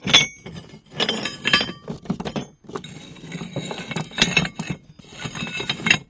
Metal bar movement in container
Handling a metal bar in a metal container